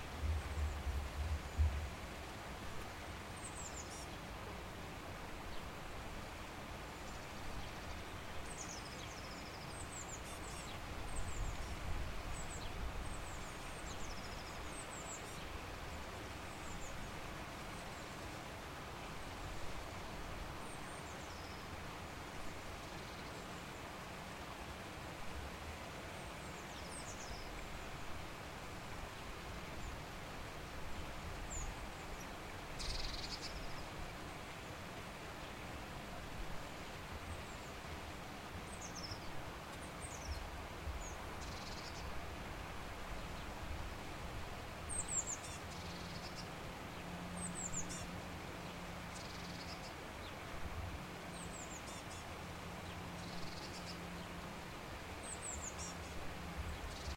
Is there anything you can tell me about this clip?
Just a small recording alongside the county (French Alps)